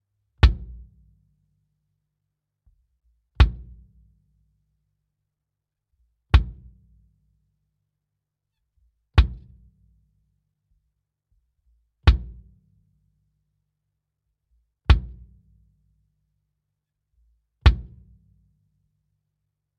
Ganon Kick Bass Drum
Kick / bass drum hit with ringing EQ'd out
percussion, hit, kick-drum, drum